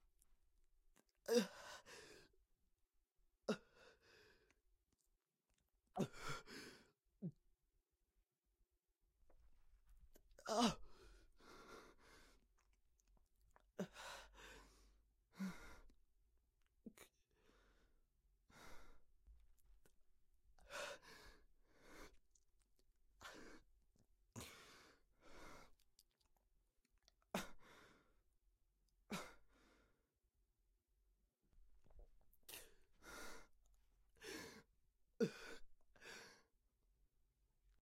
Male 20 yo / Suffering from Pain / Calm Reaction / After Fight / Slow Death / Dying
Calm, Death, dying, from, Pain, Reaction, Slow, Suffering